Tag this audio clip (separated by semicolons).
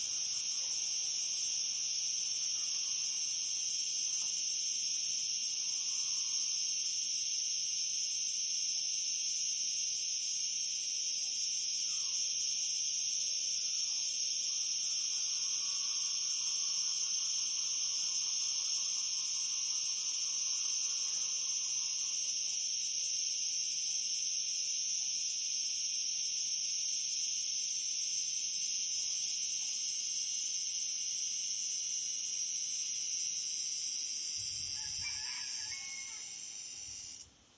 summer; insects; field-recording; nature; animals